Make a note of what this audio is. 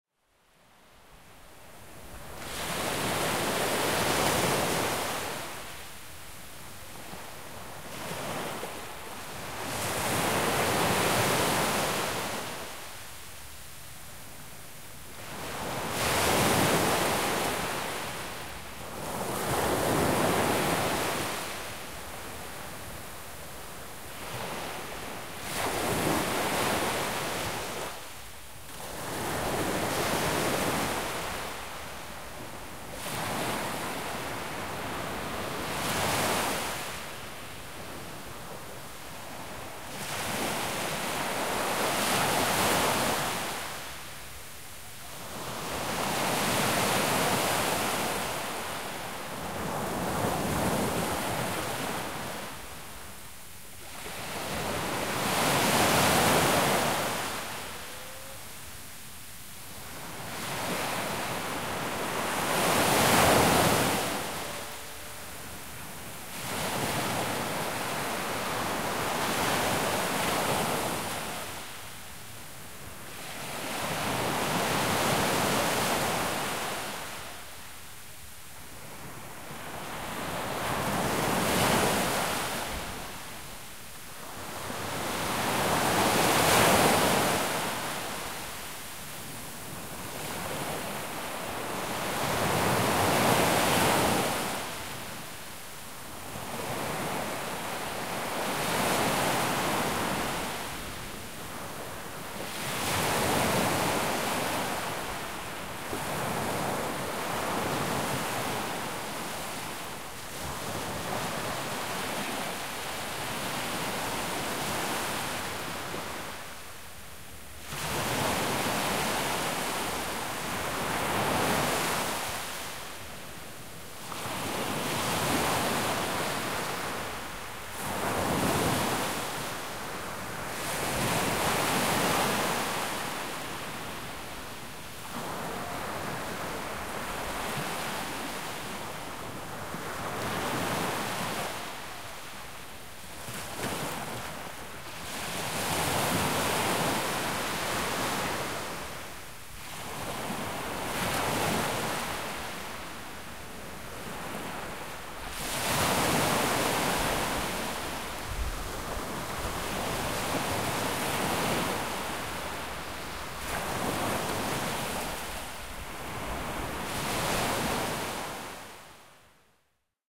AMB Ext Seaside WavesWater ESP
Shore sounds recorded in Almeria on a summer night.
Close recording of the sea using a Zoom H4N.
Enjoy.
ocean, beach, wave, coast, waves, sand, night, water, surf, splash, seaside, shore, summer